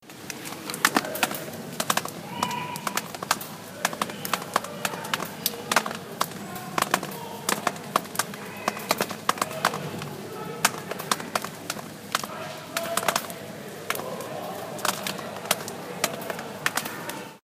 Rain2 FS
Short urban field-recording in Raval district of Barcelona at 11pm on a rainy evening. Recorded with an IPhone from a first floor balcony. Rain drops and people in the background.